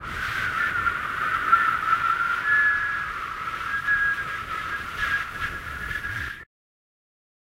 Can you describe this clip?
Wind Arctic Storm Breeze-013
Winter is coming and so i created some cold winterbreeze sounds. It's getting cold in here!